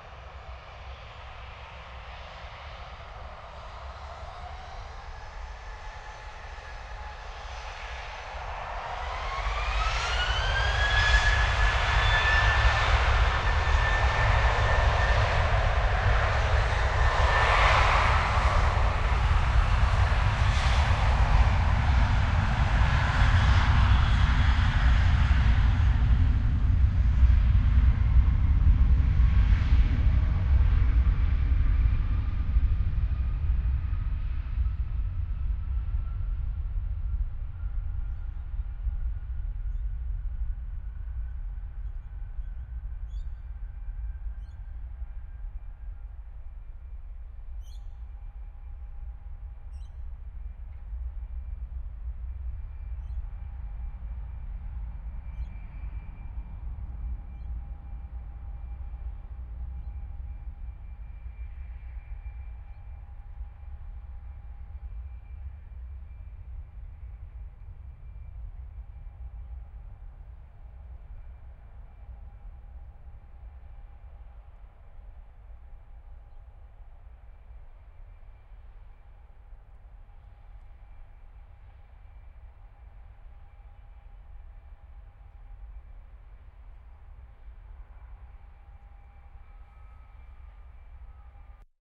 Passenger jet departs
Recorded 250 meters from the runway at 90 degrees to the direction of the planes.
airplane, takeoff, plane, roar, areroplane, noise, transport, launch